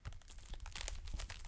Some gruesome squelches, heavy impacts and random bits of foley that have been lying around.